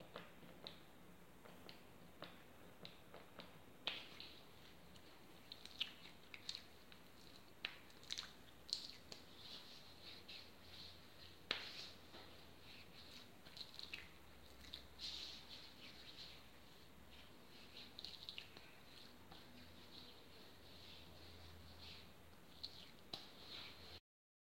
Squirting lotion and putting lotion on hands and arms.